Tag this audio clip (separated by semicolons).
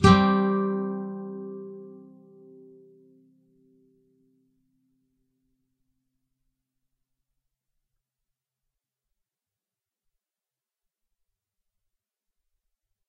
acoustic; clean; guitar; nylon-guitar; open-chords